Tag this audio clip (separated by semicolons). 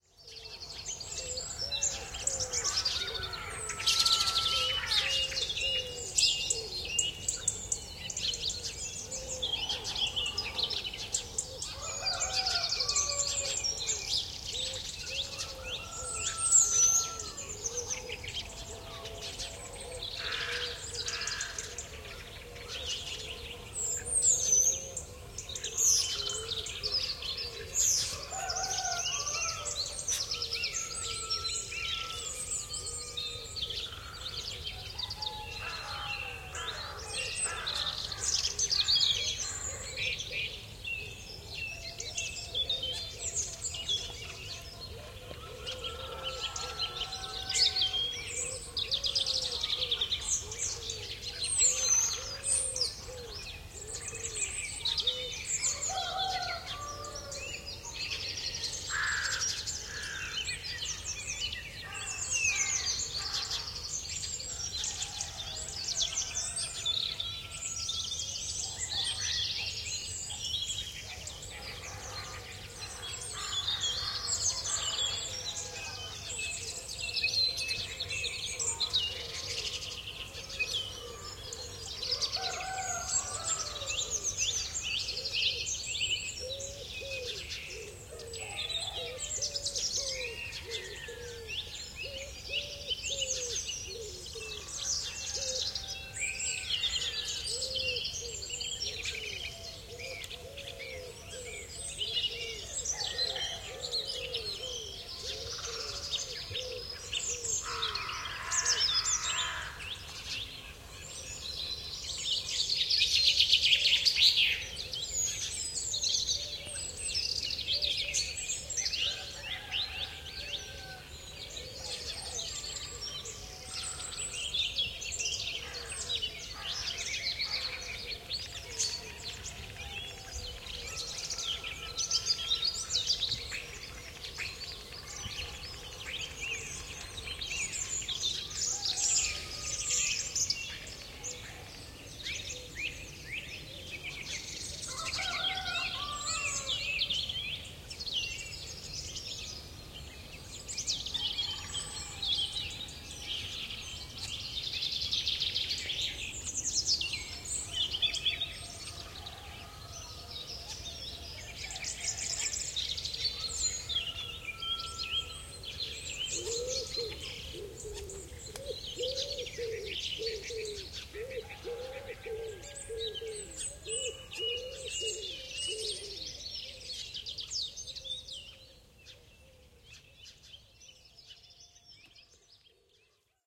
crows; field-recording; birdsong; pigeons; morning; farm; roosters; sunrise; woodpeaker; coutryside; birds; nature